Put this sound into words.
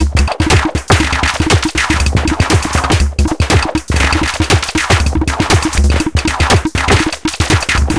A four bar four on the floor electronic drumloop at 120 BPM created with the Aerobic ensemble within Reaktor 5 from Native Instruments. Very experimental and disturbed electro. Normalised and mastered using several plugins within Cubase SX.